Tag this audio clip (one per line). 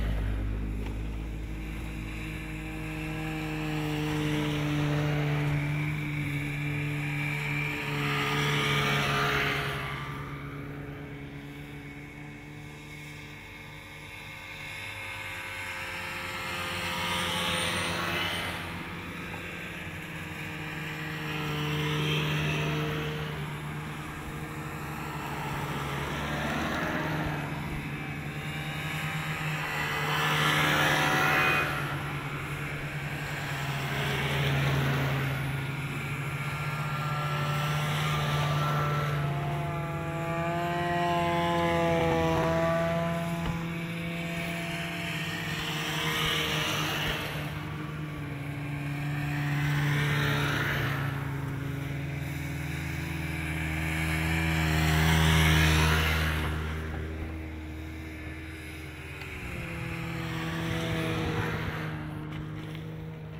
pass
snowmobiles
by
distant